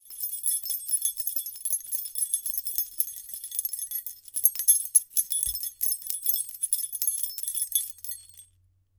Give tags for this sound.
0 chimes key shaking sounds vol

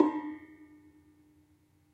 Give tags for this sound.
sica Digit Gamel o Java Digitopia pia Casa-da-m Gamelan porto

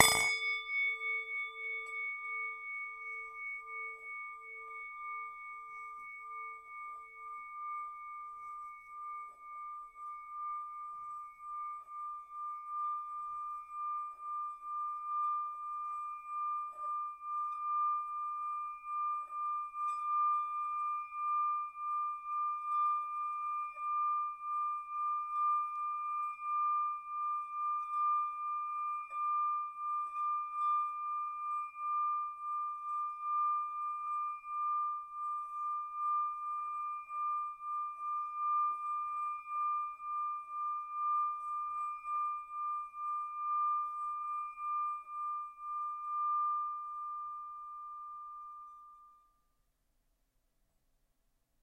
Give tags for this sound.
bowl singing tibetan

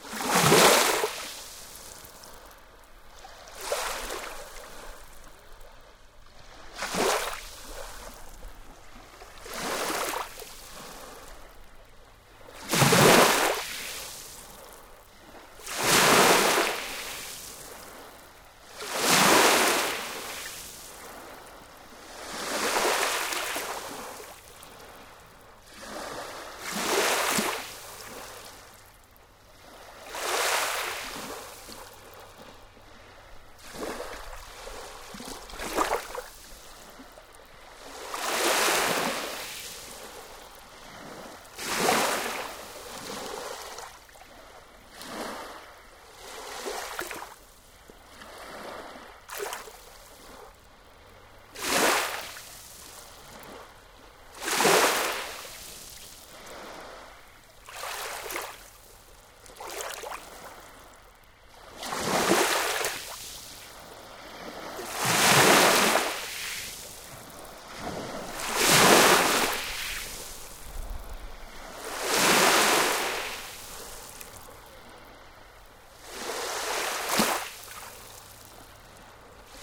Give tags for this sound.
sea waves

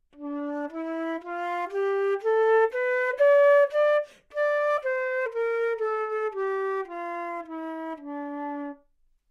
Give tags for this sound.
Dnatural flute good-sounds minor neumann-U87 scale

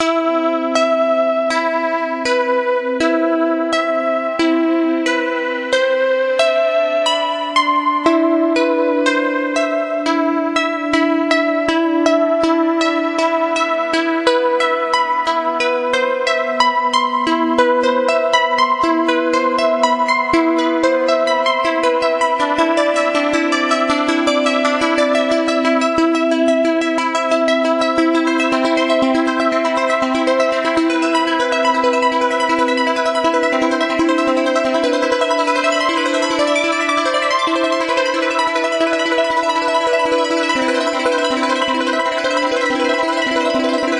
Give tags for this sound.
electronic pretty progression synth

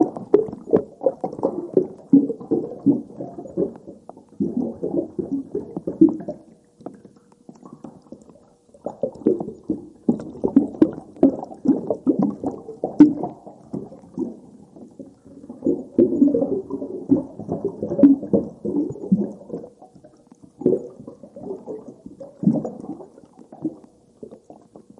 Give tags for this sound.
loop drown water

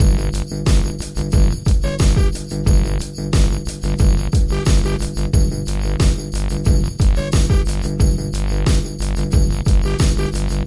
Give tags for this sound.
groove drums trans bass beat loop

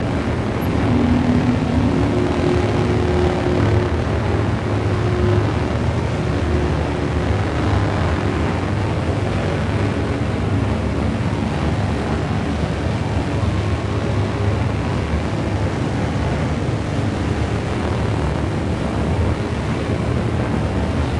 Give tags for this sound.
ambient noise drone granular

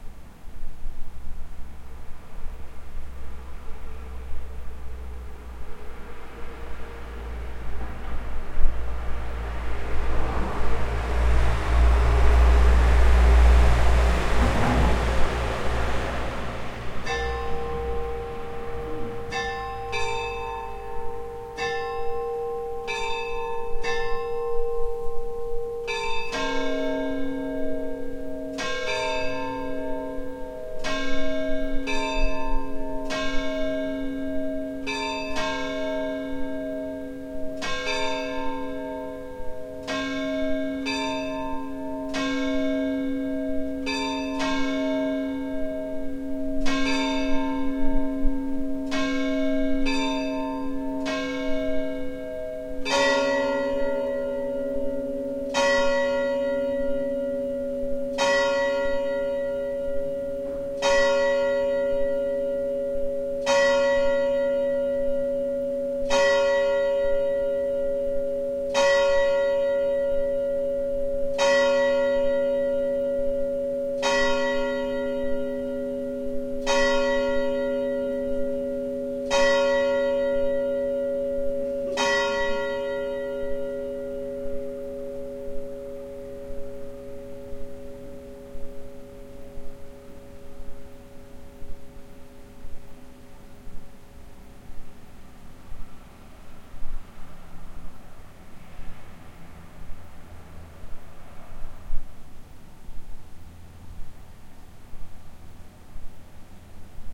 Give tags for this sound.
recording german midnight field churchbells

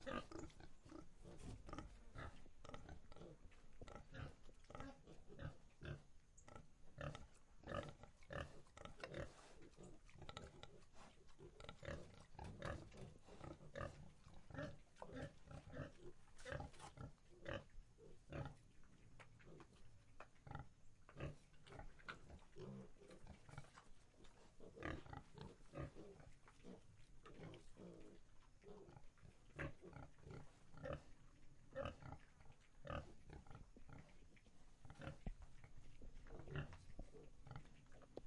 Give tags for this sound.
brazil countryside pigs

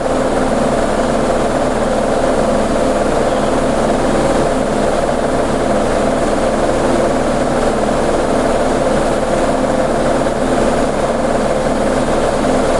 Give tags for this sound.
nature ambiance field-recording

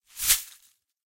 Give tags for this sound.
shake broken glass

